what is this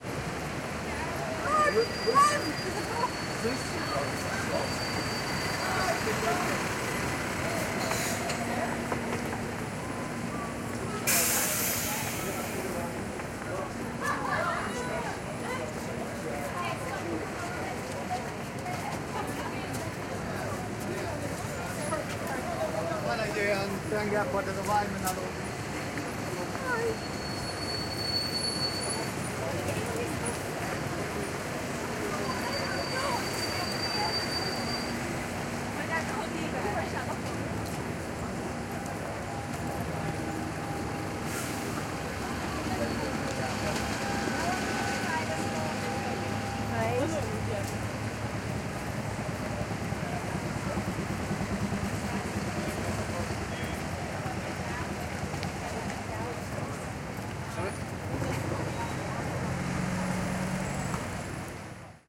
Street London Traffic People busy eq
Recording of a busy street in London. Loads of people walking about and traffic. It is the same recording as Street_London_Traffic_People_busy_no eq but this time I have applied eq to make it more usable.
Equipment used: Zoom H4, internal mics.
Location: UK, London, Euston road.
Date: 09/07/15
busy-street, field-recording, London, people, Street, talking, traffic